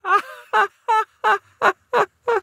Mocking laughter of an italian male recorded with Neumann tlm 103.
fun, laughter, creepy, man, voice, laugh, mocking, male